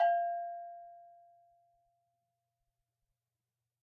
gbell 9-1 pp
recordings of 9 ghanaian double bells. Bells are arranged in rising pitch of the bottom bell (from _1 to _9); bottom bell is mared -1 and upper bell marked -2. Dynamic are indicated as pp (very soft, with soft marimba mallet) to ff (loud, with wooden stick)